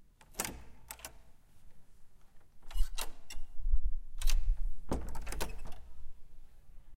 120120 opening closing door
Opening and closing a classroom door in a school building. Zoom H4n
classroom, click, close, door, open, school, shut